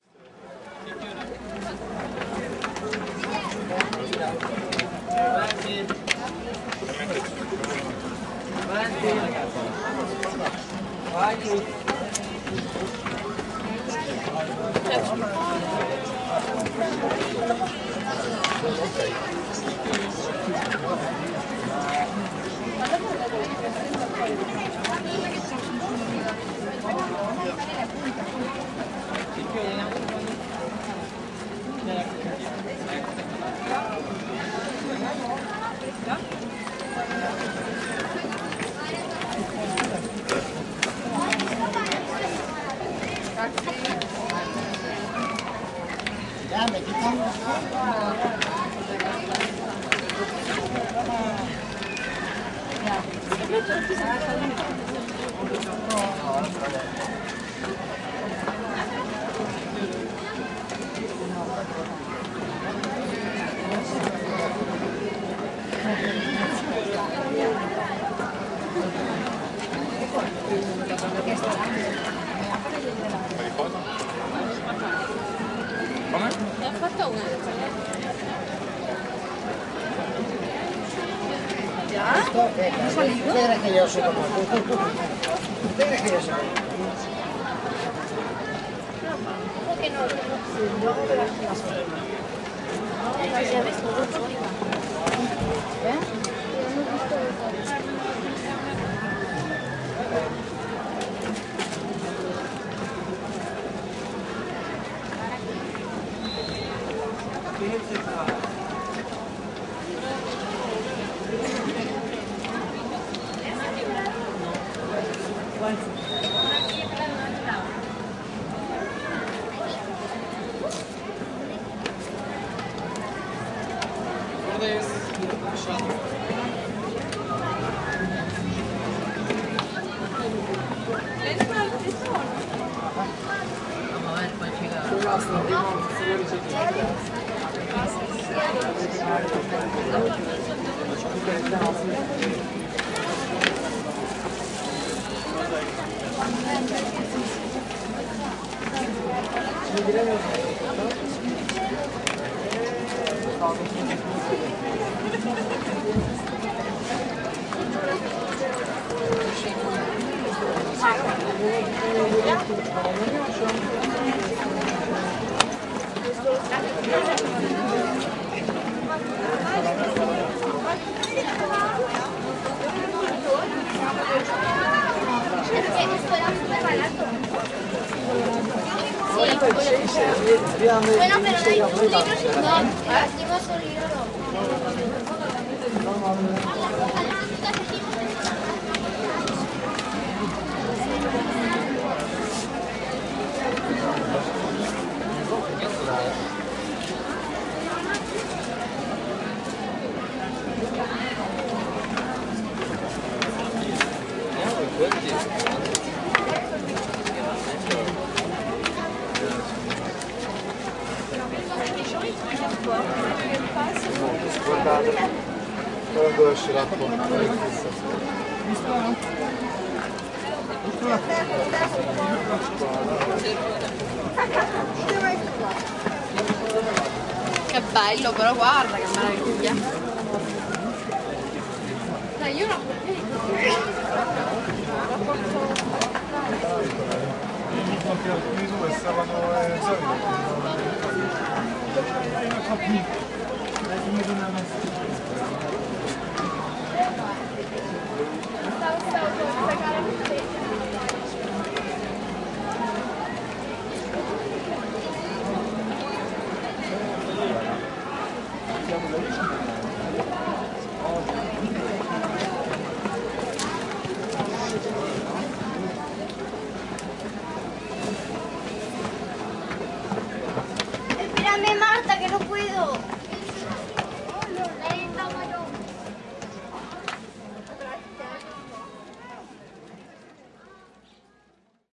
20 08 08-16 31-Parque Guell
Crew, crew… lots of crew. Tourists going up and downstairs at the entrance of the Güell’s Park. People talking next to us, over there speaking loud, here whispering, tell us about we’re on an wide open space. There are not natural sounds. That’s a little strange, considering we’re in a urban green space. Furthermore, something that also takes our attention: there’s not even a single track of mechanical or industrial sounds… Just people. Lots of people visiting the park.
s, ell, door, park, g